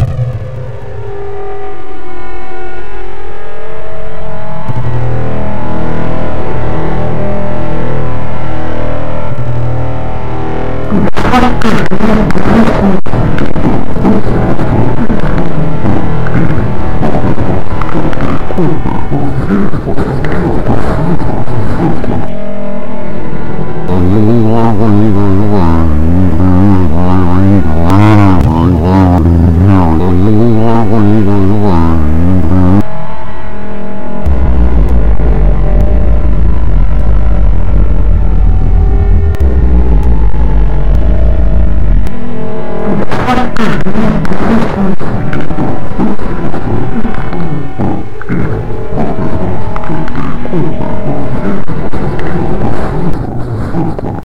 Interplanatary telephone call.You hear wife on Moon base, operator on Space Station, wife's husbond on Mars,very low voice, then back to wife.
Moon, telephone, Mars